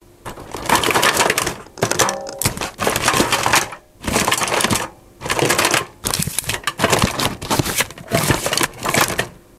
Rummaging in a drawer
A sound effect of rummaging around a drawer
rummaging, drawer, searching, rummage